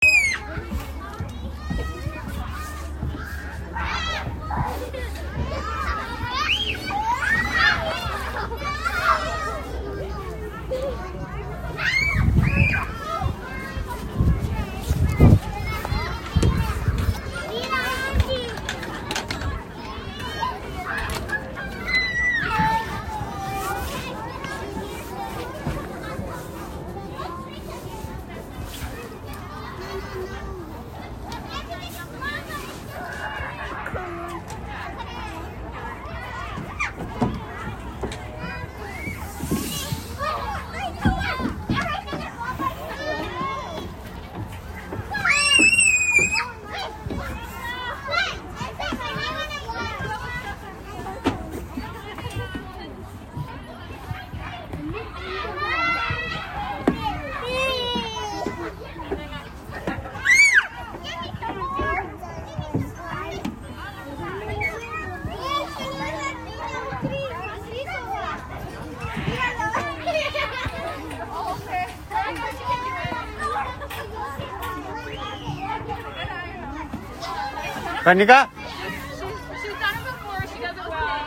Kids Playing Sound Effect
Hello. Greeting. I went with my daughter in one park and recorded the sound of all children playing. Hope you will enjoy this sound in your project.
children, kid